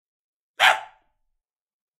The sound of a small dog barking (except it's me, imitating one. But no one needs to know that ;)).